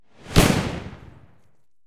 explosion high fuse 1
Explosion with short "fuse" before the bang. Made of multiple firework-recordings.
dynamite, detonation, eruption, bomb, burst, fuse, movie, sondeffect, tnt, firework, boom, explosive, bang, blast, explosion, sfx, explode